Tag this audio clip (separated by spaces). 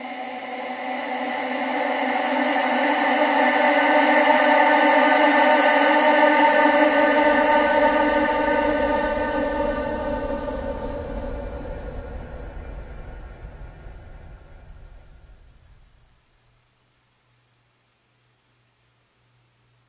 Horror Monster Scary Scream